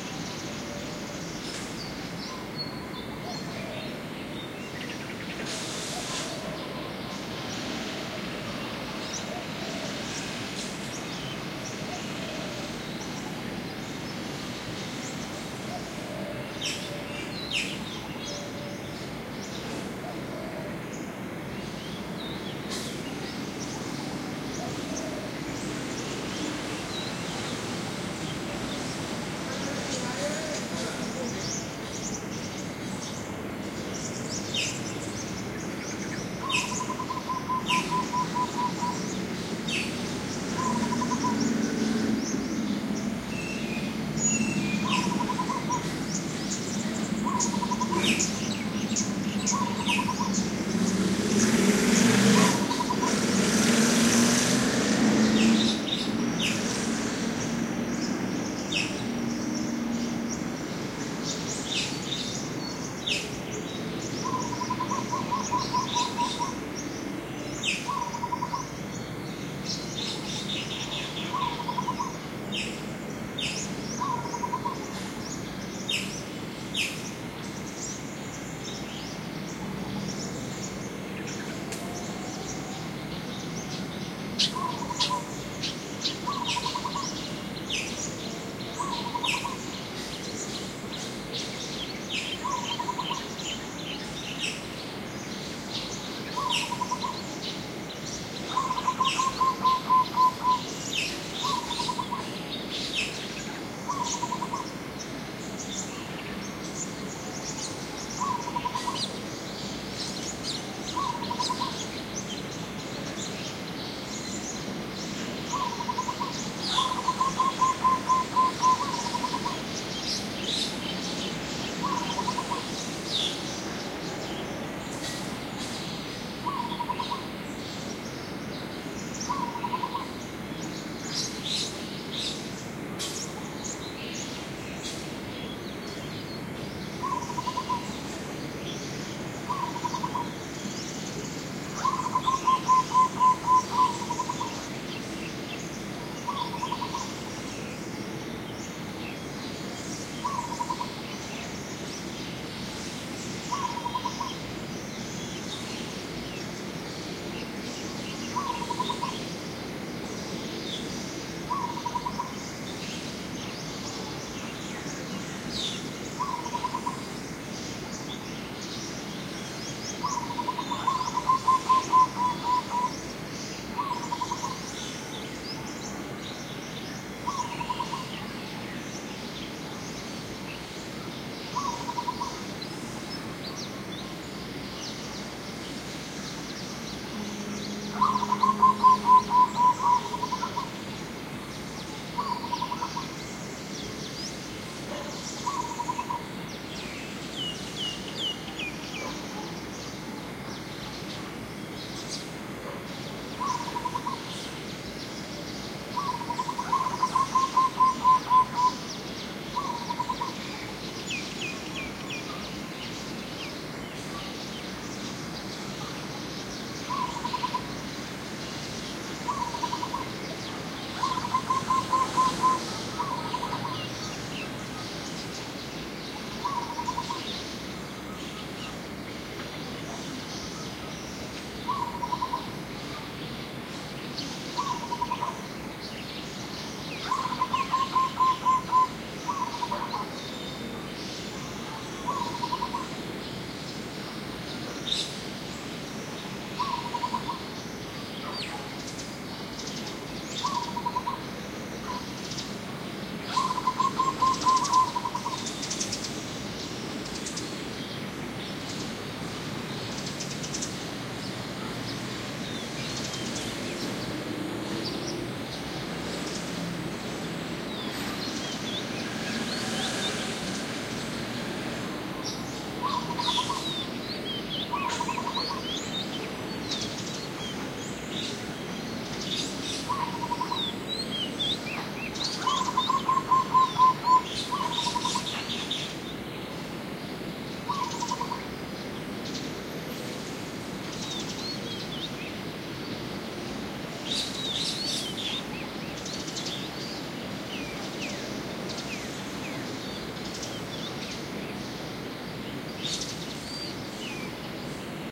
Stereo Recording 5 minutes of ambiance sound from Samutprakarn Thailand at 07:15am by Pair of Superlux condensers microphone through EMU-404 with EQ Adjustment to reduce noisy from this cheapest MIC.